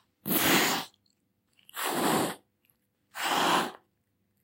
Very Angry Cat.
How it was created: I annoyed my cat a lot, without mistreating it. Recorded by me on a cell phone Samsung J5
Software used: Audacity to reduce noise, edit and export it